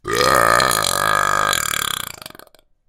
A monster burp.
A studio recording of my friend Cory Cone, the best burper I know. Recorded into Ardour using a Rode NT1 and a Presonus Firepod.
belch, burp